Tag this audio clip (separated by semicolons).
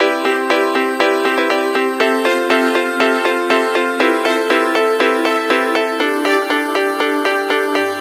positive; keys; pads; melody